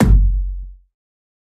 CRDN PNDLRGBT KICK - Marker #106
heavily pounding bassdrum originally made from 10 litre bottle punching sounds recorded with my fake Shure c608 mic and heavily processed by adding some modulations, distortions, layering some attack and setting bass part (under 200 Hz) to mono.
will be nice choice to produce hip-hop drums, or experimental techno also for making cinematic thunder-like booms
effected, kickdrum, processed, bottle, oneshot, kick-drum, designed, one-shot, bassdrum, kick